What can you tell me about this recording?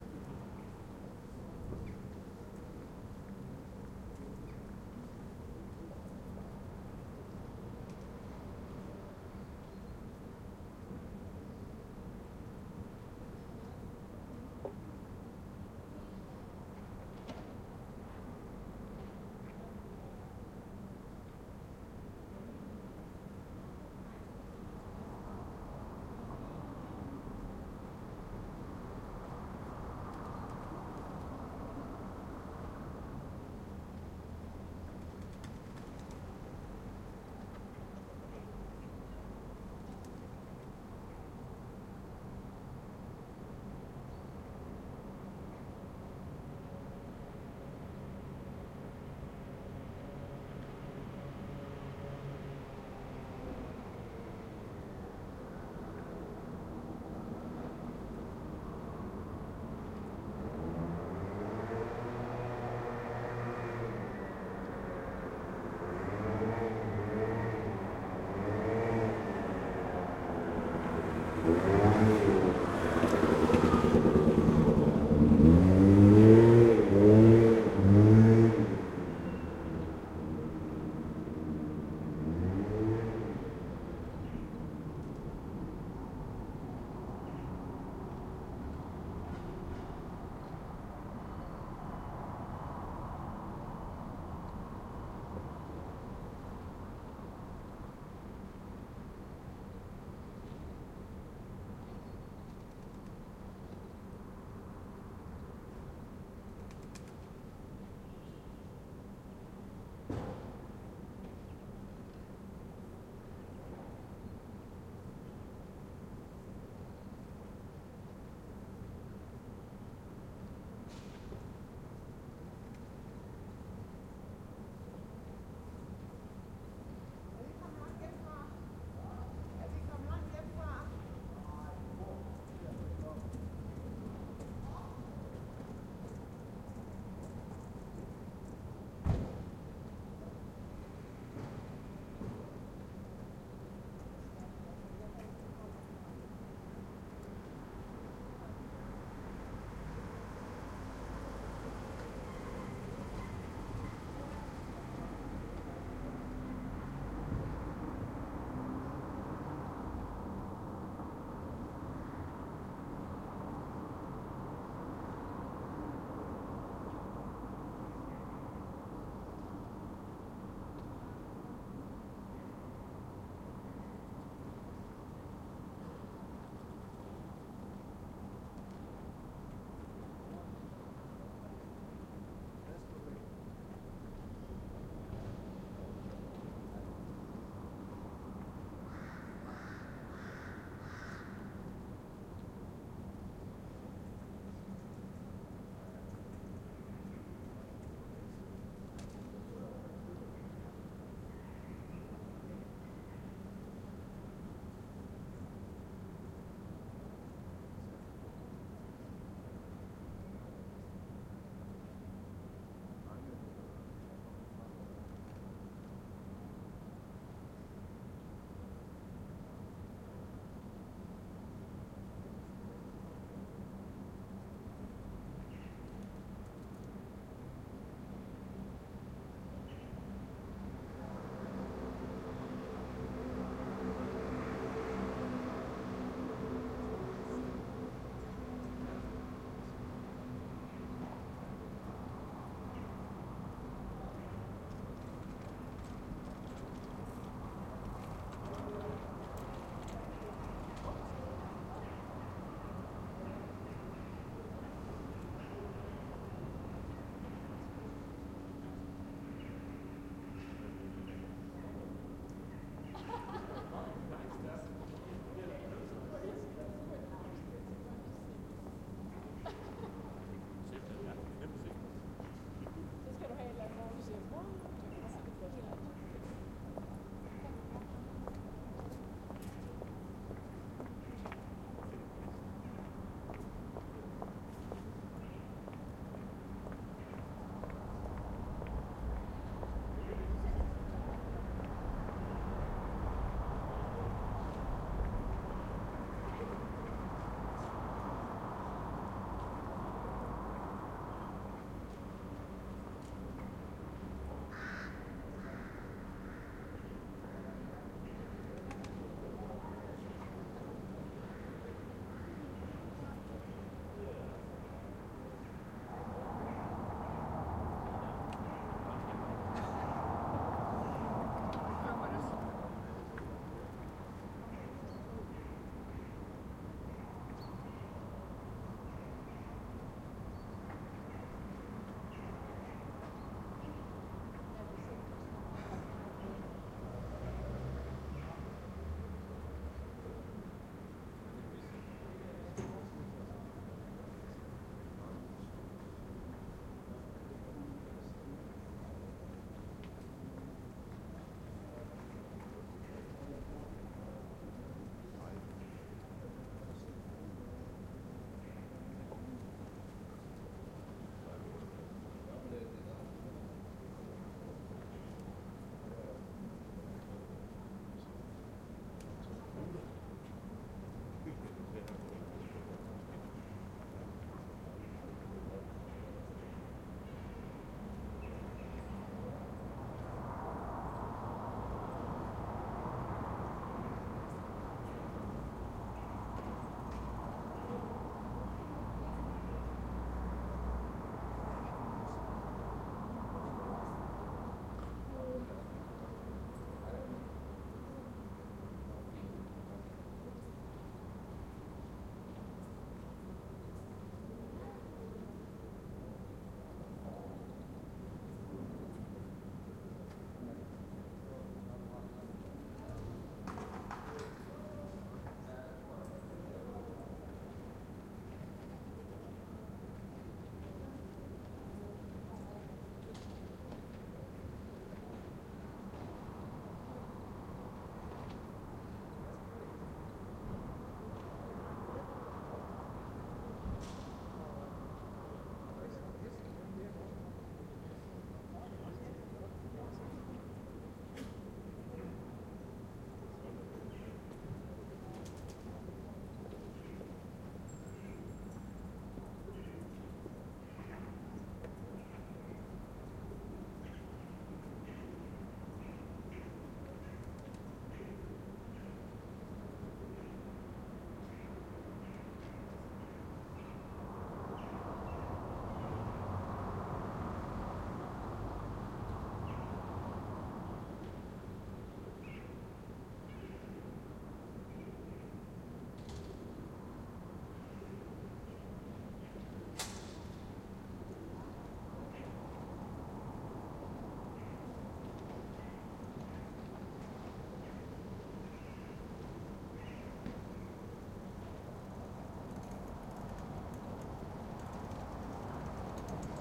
Esbjerg townsquare sunday 2012-10-14
I was going for a walk in around the center of Esbjerg, when i got to the town square. It was kind of deserted, and only populated with people crossing over or passing by. A bit scary, when i think about how many people the town square use to hold, in the weekdays.
Recorded with a Zoom H2
atmosphere, bike, birds, car, cars, city-ambiance, city-atmosphere, echo, esbjerg, field-recording, flap, flapping, moped, pedestrians, people, pigeon, pigeons, Town-square, Zoom-H2